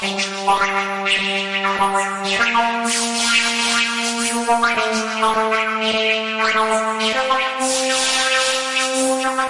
Synthesizer with Organ. 2 bars. Sheet: G and A minor.
101bpm, FX, Loop, Sample, Synthesizer